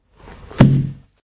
Closing a 64 years old book, hard covered and filled with a very thin kind of paper.
household, lofi